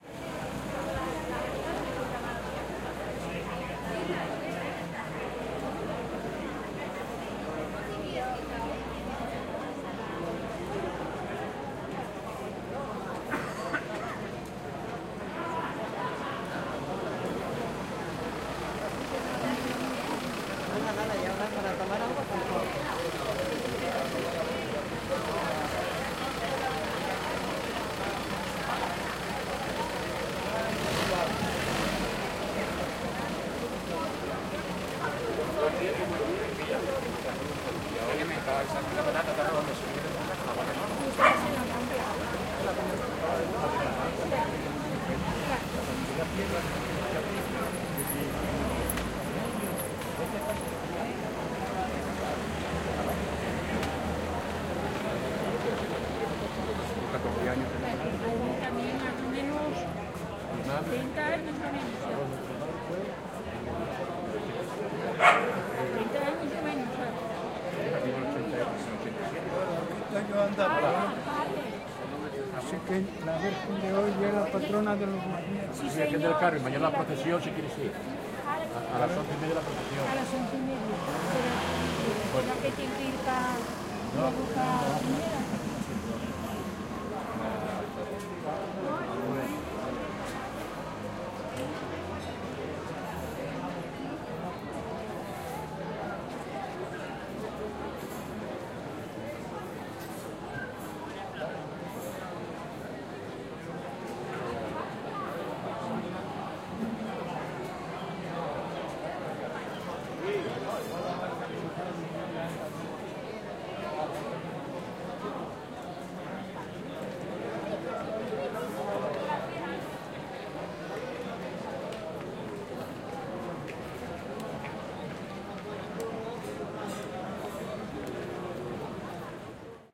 160716 HSN spanish plaza
Spanish Puerto Cudillero on a Saturday evening. People talking vividly. A car passes by.
voices, Asturias, Spain, field-recording, crowd, harbor, street, people